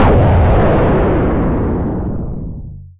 An explosion handcrafted throught SoundForge's FM synth module. 7/7